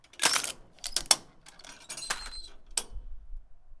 Crushing an aluminum can in the backyard with our can crusher.